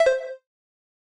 Beep 06 double 2015-06-22
a sound for a user interface in a game
beep, user-interface, videogam